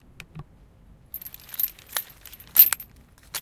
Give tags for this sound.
bike
chain
lock

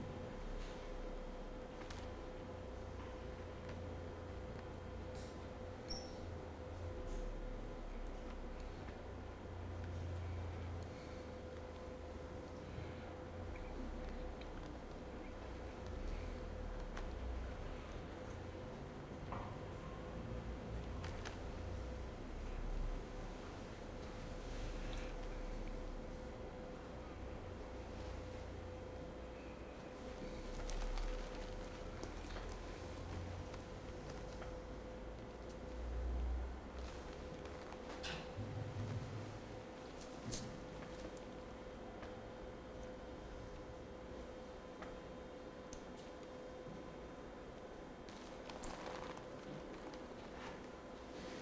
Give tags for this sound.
cafe
indoors
village

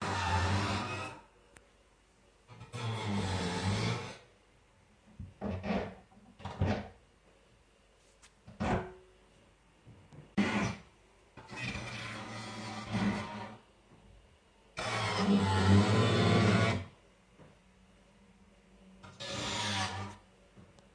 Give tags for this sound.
banging
construction
drilling
hammering
power-tools

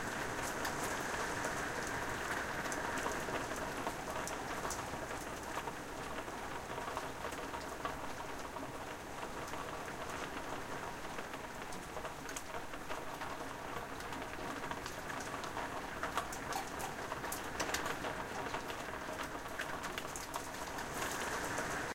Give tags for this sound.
atmosphere
california
rain
suburb
thunderstorm

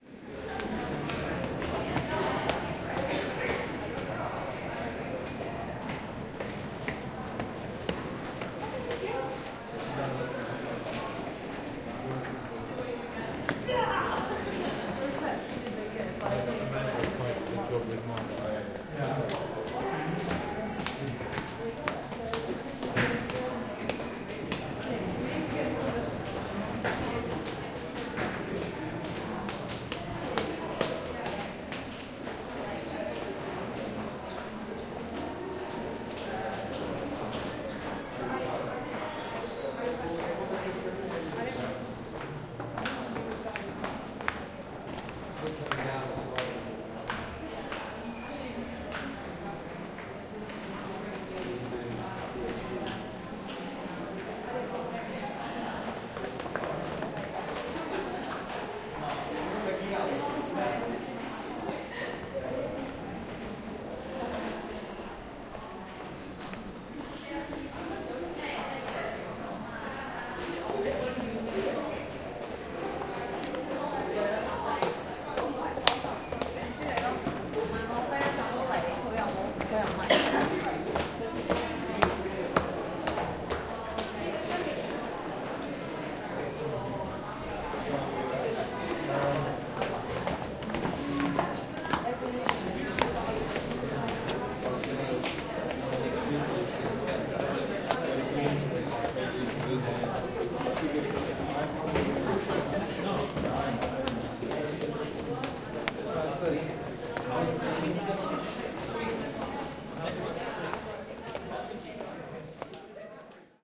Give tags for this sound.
feet
bustle
steps
London
academic
academia
voices
noise
field-recording
University-College-London
stairs
UCL

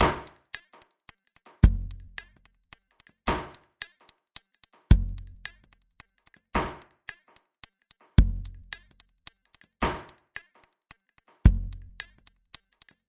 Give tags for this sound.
alternative
beats
breakbeats
breaks
drum-loops
drums
loops

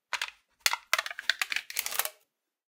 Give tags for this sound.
metallic
aluminum
lid
field-recording

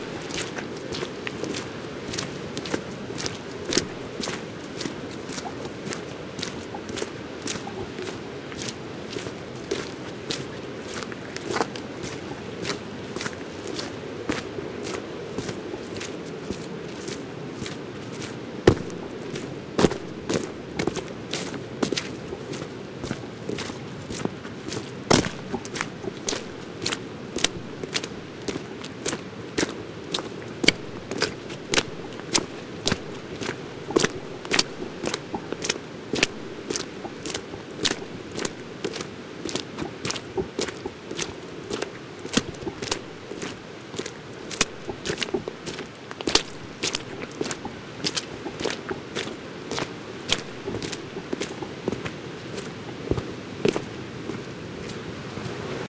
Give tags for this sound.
stream
oregon

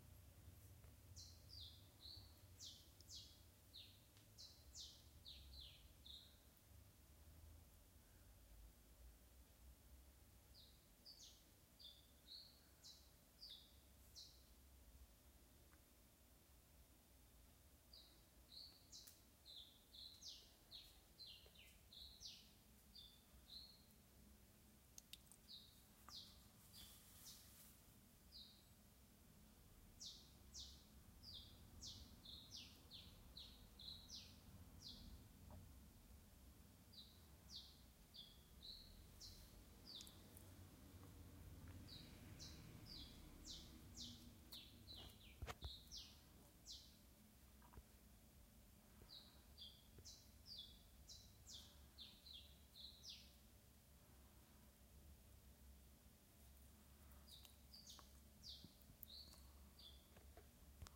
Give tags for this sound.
singing
ambient
birds

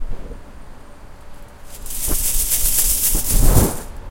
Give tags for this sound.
fence
metal
hit

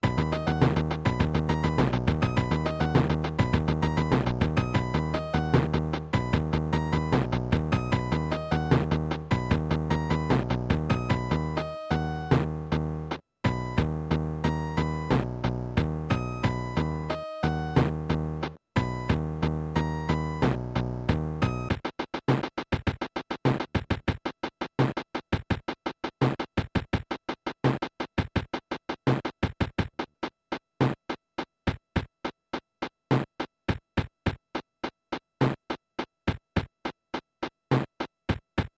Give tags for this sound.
cheesy
rock
casiotone
accompaniment
kitsch
fun
lo-fi
auto-play
electronic

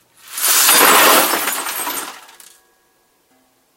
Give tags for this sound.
glass
break
trash
broken
shards
pour
can
dump
drop
crash
tinkle
smash
garbage
bin
rubbish

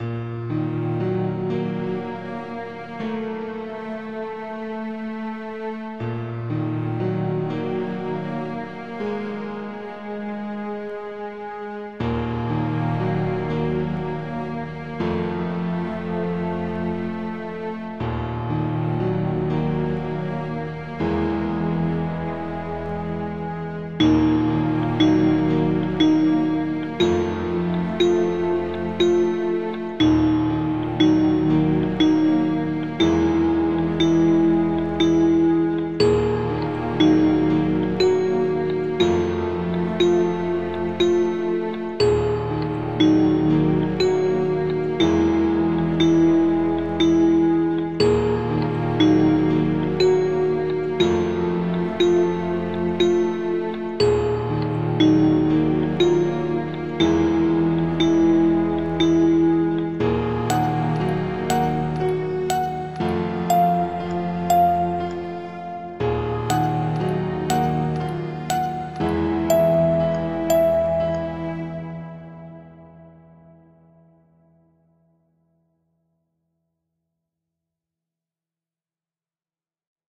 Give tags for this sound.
cinematic
film
game
movie
music
mysterious
perfect
piano
puzzle
symphony

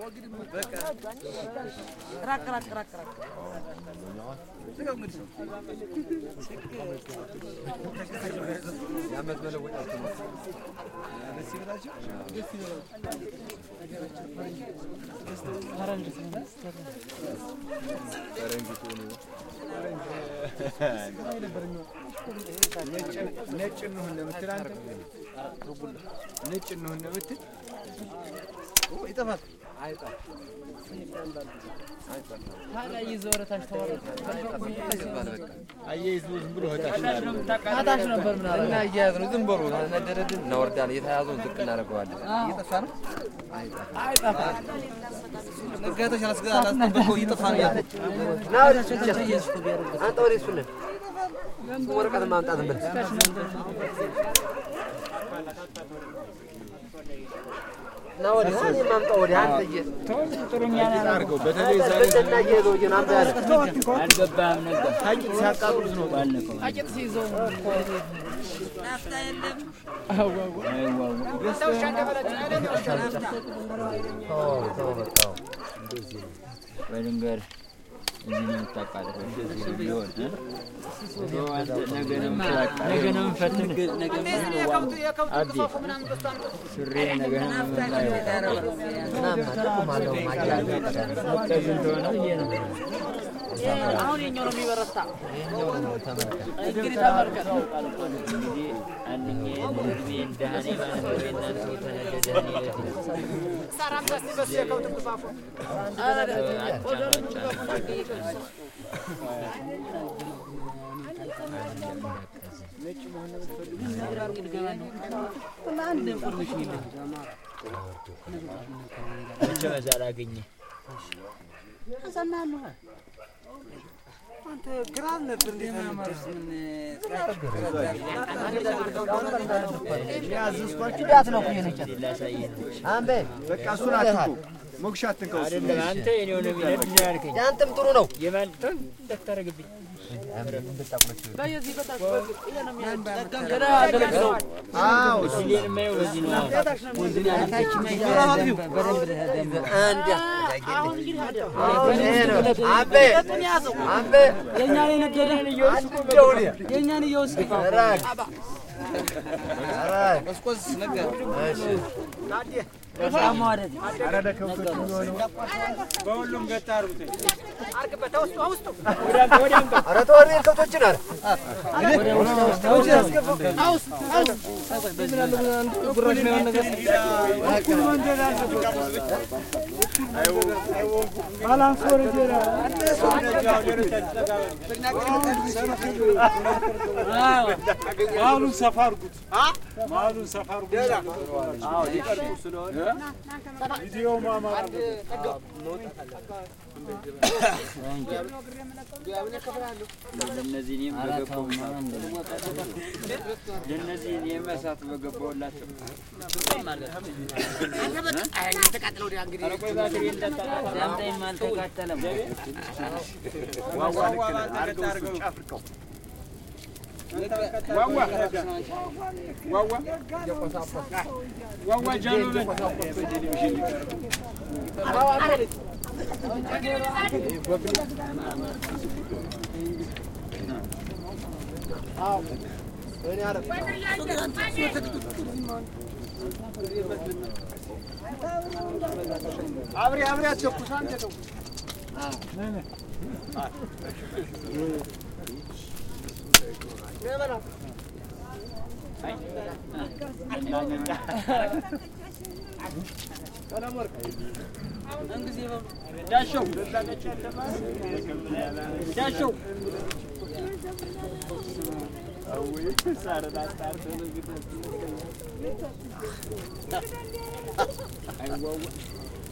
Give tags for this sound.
ethiopia
field-recording
fire
laught
night
people
speaking
voices
wood

